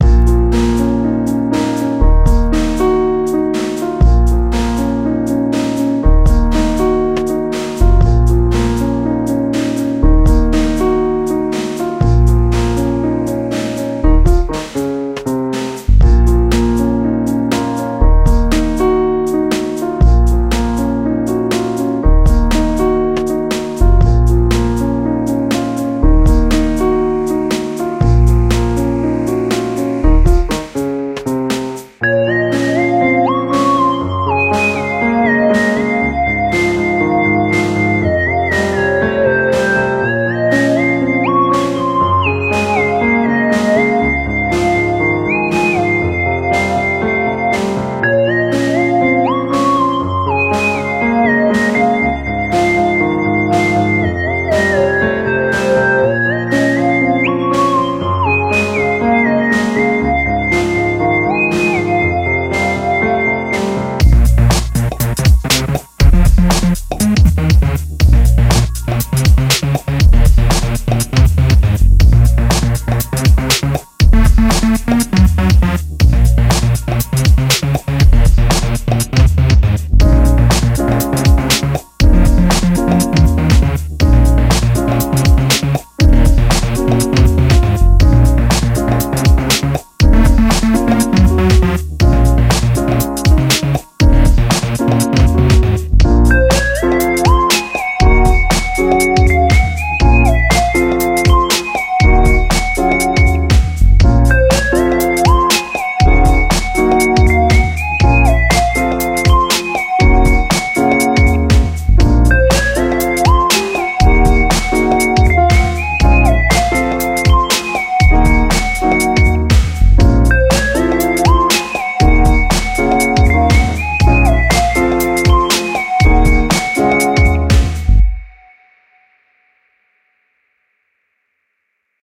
A beat with drum and bass
Check it out!